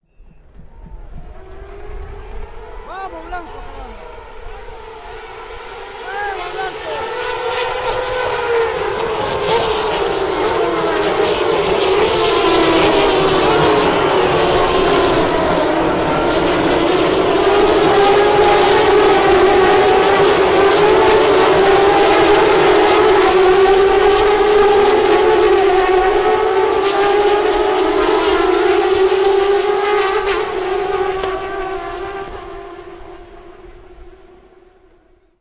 TC.Balcarce08.Start
TC Race at Balcarce, Argentina. Race start, released in movement, recorded form 1rst turn (about 300mts from StartLine), a guy shouts “vamos blanco todavia” (Come on WitheCar, meaning the PoncedeLeon driver´s Ford car).
Recorded with ZoomH4, LowGain
race, sound